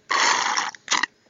Slightly old audio which I recorded for a scrapped game. It was just me slurping from a milk carton.
straw, slurp, sfx, milk, carton, effect, sipping, slurping, sound